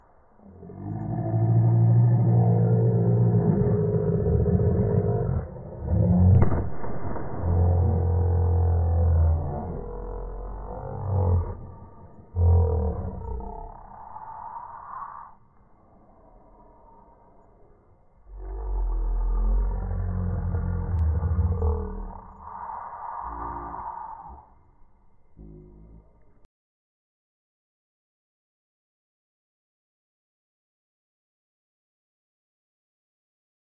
Recorded a friend getting yelling in slow motion, ended up sounding like some sort of huge monster/creature roaring. Recorded on Google Pixel 3XL.
Deep, Monster, Voice